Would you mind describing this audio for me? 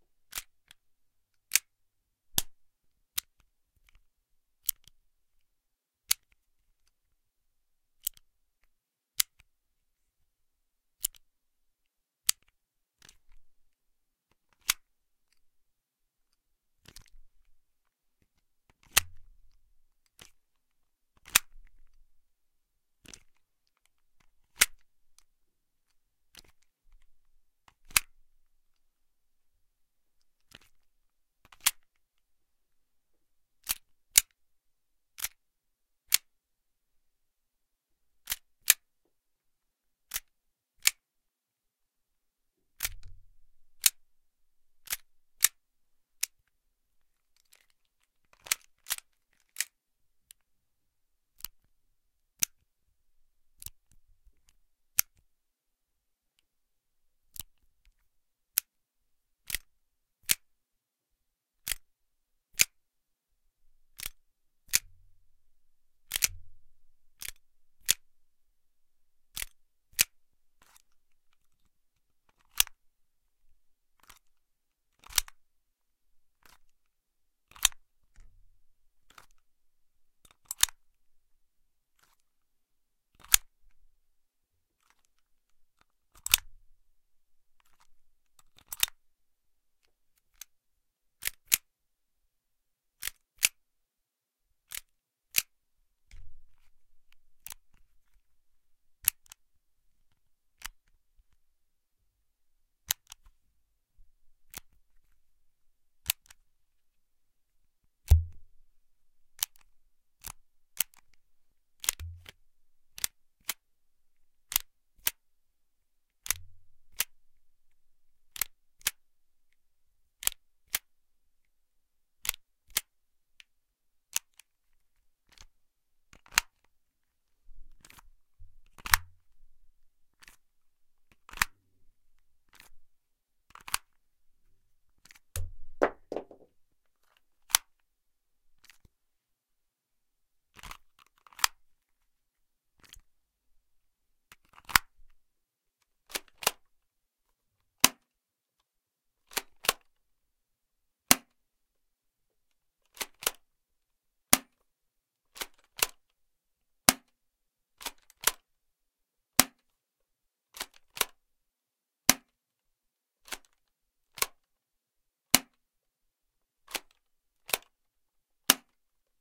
Pistols/Handguns manipulated and toyed with
Sound of various airsoft handguns, both metallic and ABS (Plastic), being cocked, decocked, magazine pulled in and out, trigger pressed when empty, and at the end, a plastic shotgun being cocked and fired.
The weapons being recorded (in order of appearance) are a metallic KJW KP-05 (Hi-Capa, 1911-esque); metallic WE Colt 1911; a ABS Tokyo Marui Beretta M92F, and a chinese plastic short-barreled shotgun.
Recorded with a Studio Projects B1 + M-Audio Fast Track Pro, in a recording studio.
1911, weapon